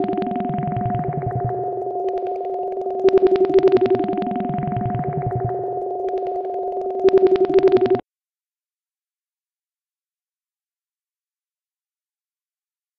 a possible other warning or telephone
alien, outerspace, sf, space-ships